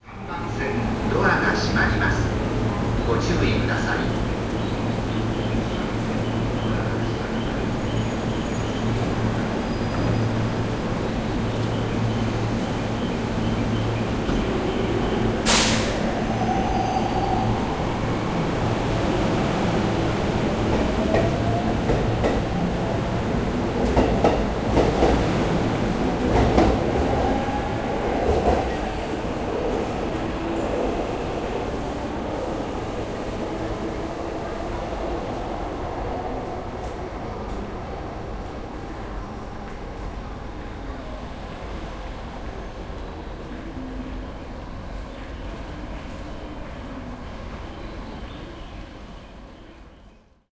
0403 Subway station
Information from speakers in japanese in Akihabara Station. Subway coming, subway rail. Advertising in the background. Bird alarm.
20120807